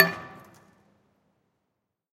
Recordings of different percussive sounds from abandoned small wave power plant. Tascam DR-100.